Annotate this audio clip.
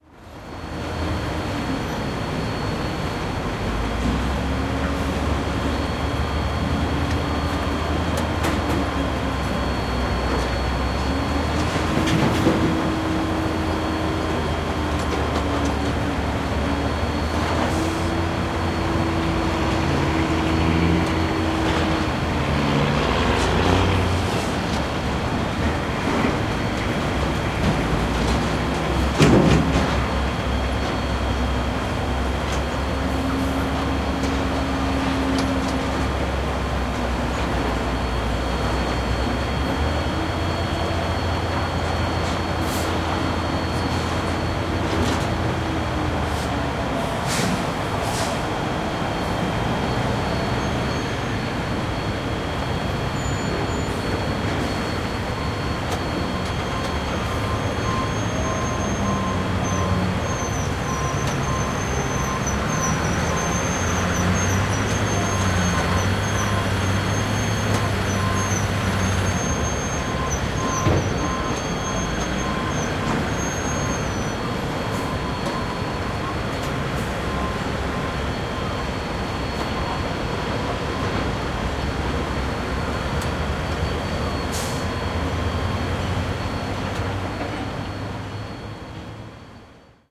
Goldmine, ambience.
Taken at a very large gold mine in South Africa, you can clearly hear machinery operating in the foreground, and also ventilation and the powerhouse in the background.